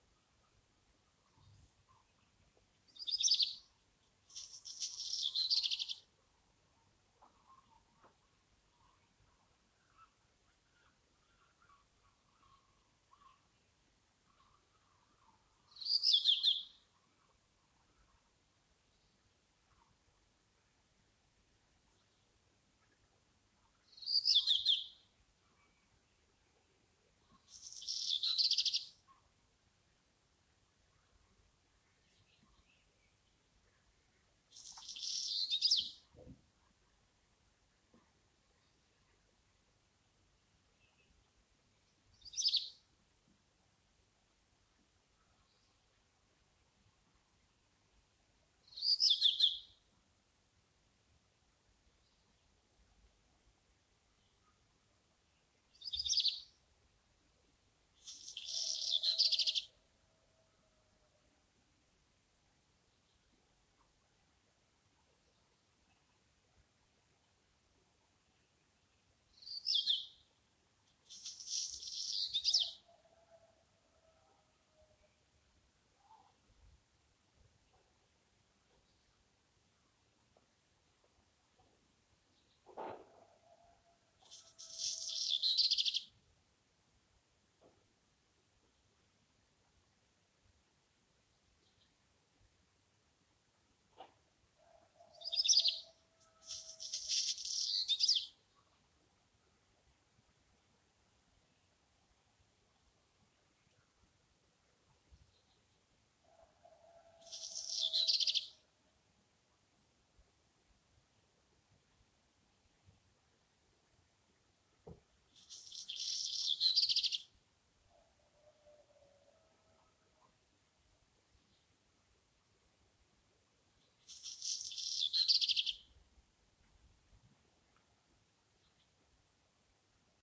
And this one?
City birds before dawn
Cute little birds recorded from my window at 5.30am
birds, birdsong, dawn, home-recording, morning, nature